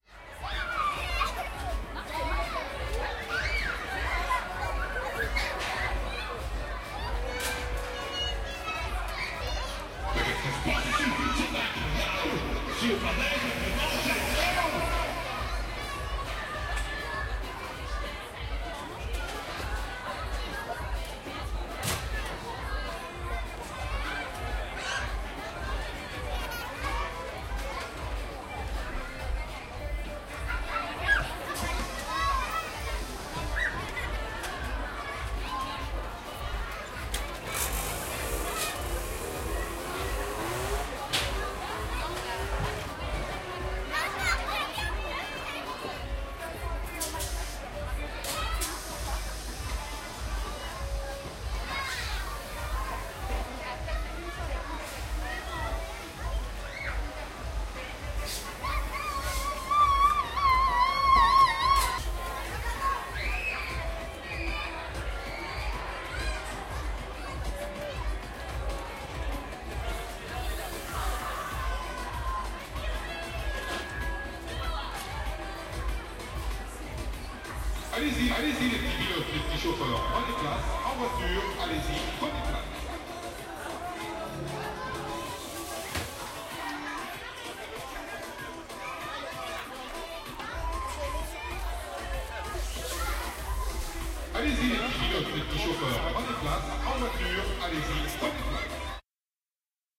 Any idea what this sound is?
Sample made in april 2018, during participatory art workshops of field-recording and sound design at La Passerelle library Le Trait d'Union youth center, France.
Sample 1
Cash register with multiple delays.
Sample 2
Piece on drum with slow audio.
Sample 3
Ride cymbal with reverb.
Sample 4
Torn paper with bitcrushing.
Sample 5
Trash bin percussion with reverb.
Sample 6
Quantized trash bin rythm.
Sample 7
Percussion on metal and shimmer
Landscape 1
Morning view from the banks of the Saone, around Trévoux bridge, France.
Landscape 2
Afternoon carnival scene in Reyrieux, France.